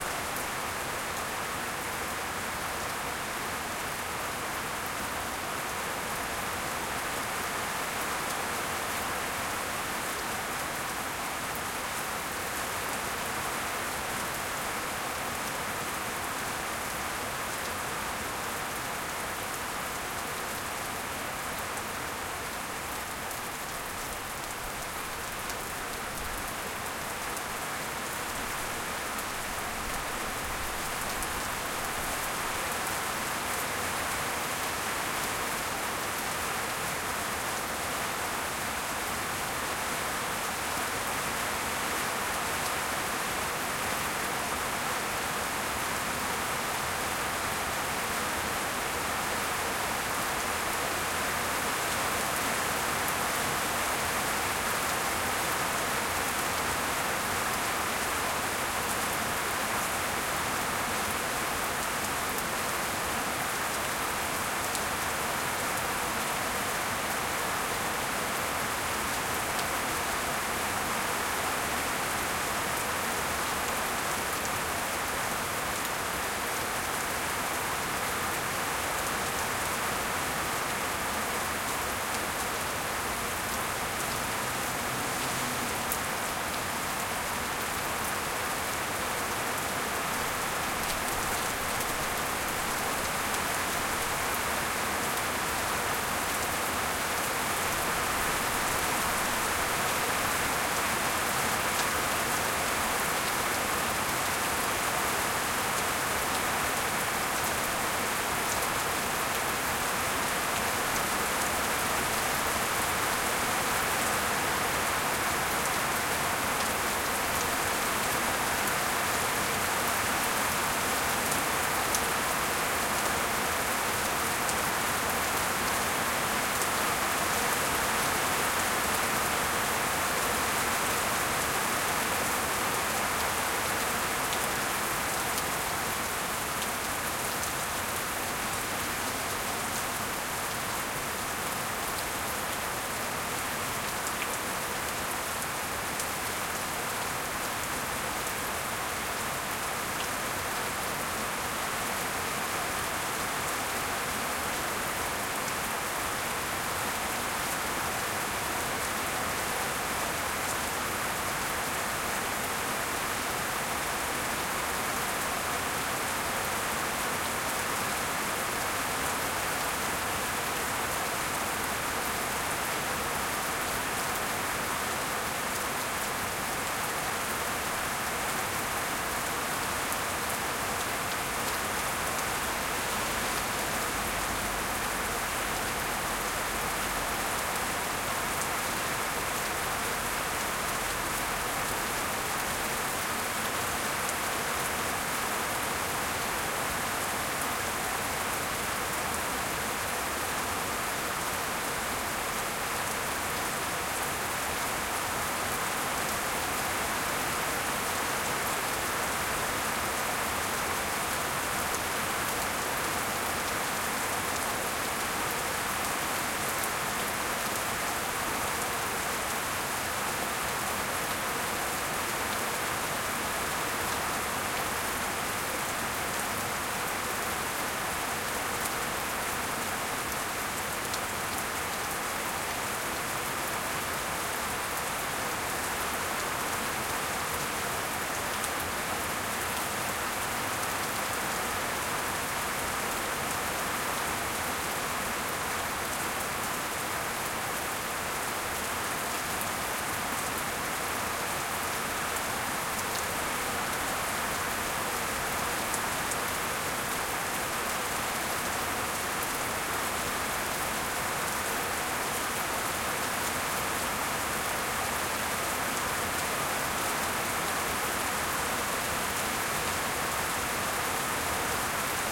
Outdoor rain
This was recorded using Zoom H6 MS configured microphone in the middle of the rain outside the house under the roof of the carpark.
car, heavy-rain, downpour, weather, brunei, rain, rural, tropical